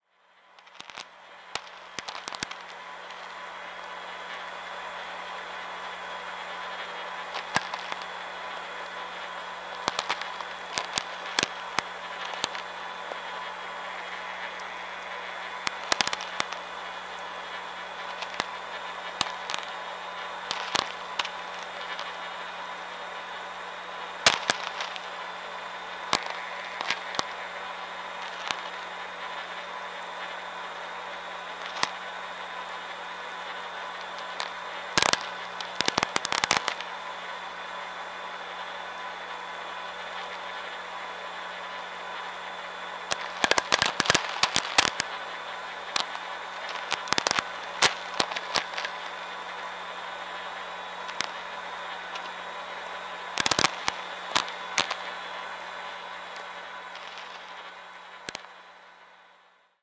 inspire 01Feb2008-07:00:01
offers a public continuous source of audible signal in VLF band direct of our ionosphere.
In this pack I have extracted a selection of fragments of a minute of duration recorded at 7:01 AM (Local Time) every day during approximately a month.
If it interests to you listen more of this material you can connect here to stream: